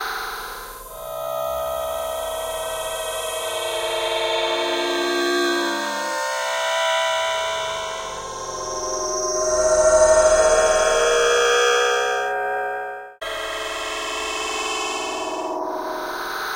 A shuffled and heavily processed sample from the spectrum synth room in Metasynth. There is a lot of resonance and smoothing of attacks and it is very slowed down, it is nearly impossible to tell it is made from a female voice. The original input was myself singing part of the song Hello by Dragonette.
slow, spectrum-synthesizer, voice, hiss, smooth, metasynth, female-voice, processed-voice